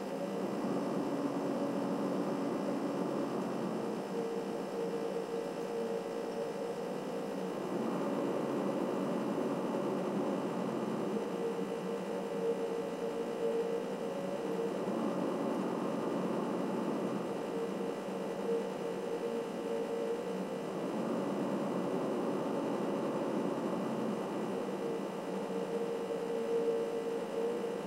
the sounds of a refrigerator breathing near a sony pcmd-1, in loop form.